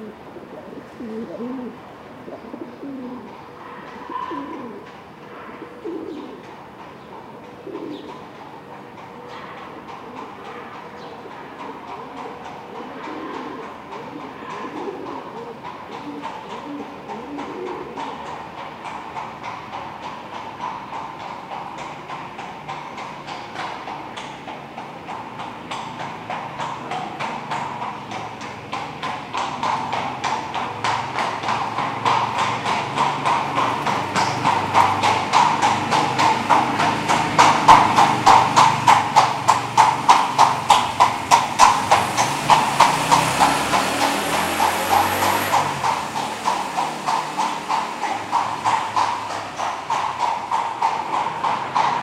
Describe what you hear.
First you listen a pigeon cooing near the mic, then a horse-drawn carriage approaches at a good pace, followed by the unavoidable (and impatient) motorbike. Recorded from a balcony into a narrow reverberating street in Seville, Spain. Sennheiser ME66 into Shure FP24./ arrullo de una paloma, seguido de un coche de caballos que se acerca rapidamente... con una moto impaciente detrás